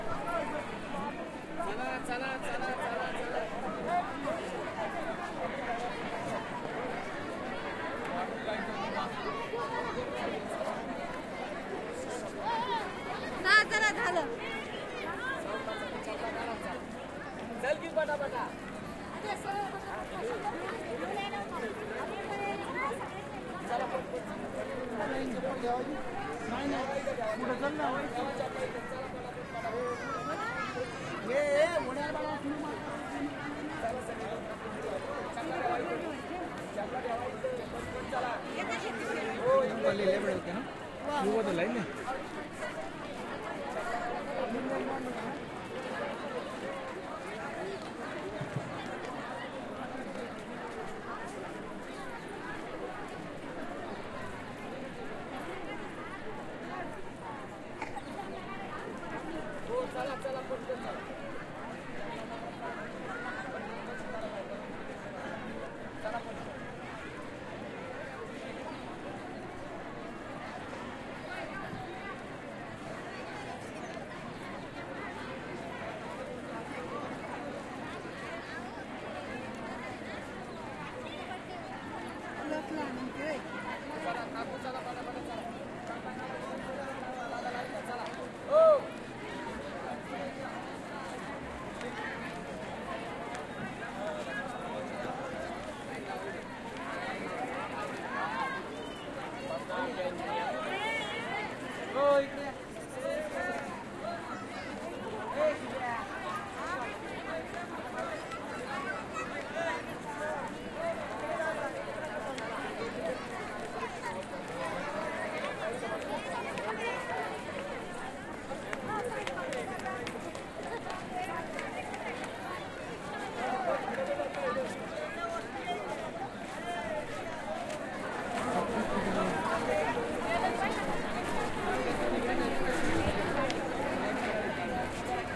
India outdoor crowd
India, Kolhapur, crowd near the Mahalakshmi Temple
Schoeps ORTF